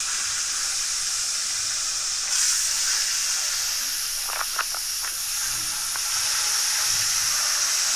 Sonic Snaps GEMSEtoy 32
sounds, snaps, Switzerland, home, sonic